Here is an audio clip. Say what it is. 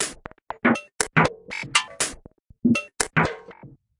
TinCanBeat 120bpm04 LoopCache AbstractPercussion
Abstract Percussion Loop made from field recorded found sounds
Abstract, Loop, Percussion